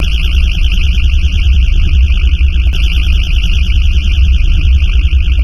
I like simulation or experiments creating sounds that remind of Engines.